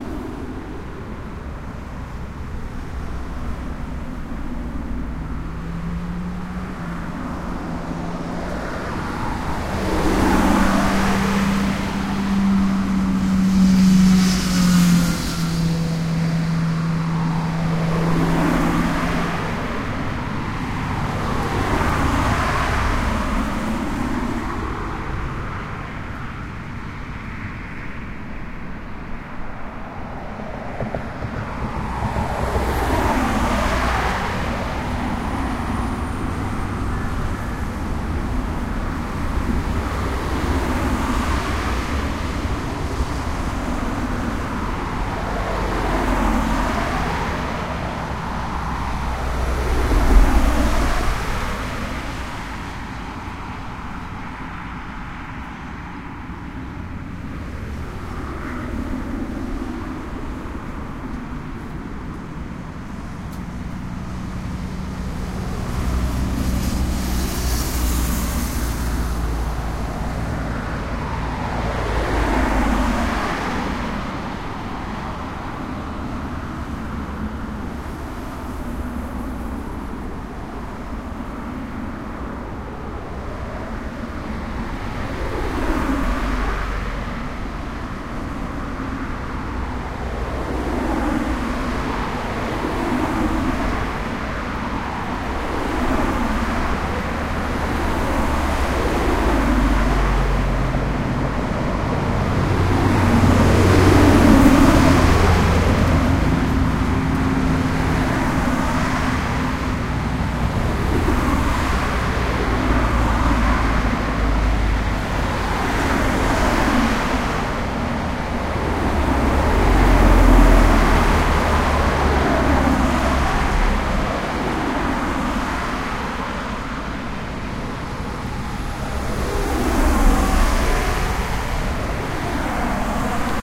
dual-carriageway
Cars and vans passing in both directions on a dual carriageway
Recorded with Tascam DR05
engine, road, field-recording, vehicle, street, driving, van, car, passing